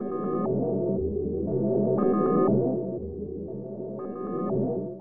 probe1gain
experimental, filter, noise, probe, software, synth